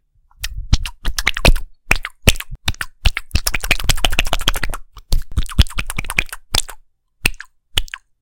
Floppy Jelly Goo Sounds.
dungeonsanddragons, slime, goo, slop, rpg, podcast, storytelling, fantasy, monster, dungeons, dnd, jelly, floppy, dragons, mush, gross